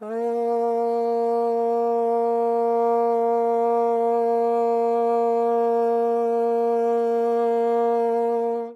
Low note (A#) of a plastic vuvuzela played medium-soft.
microphone used - AKG Perception 170
preamp used - ART Tube MP Project Series
soundcard - M-Audio Auiophile 192
africa, football, horns, vuvuzela, soccer